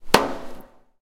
snd ImpactNormalWood03
metal impact of a wheelchair with wood, recorded with a TASCAM DR100
wheelchair, wood, impact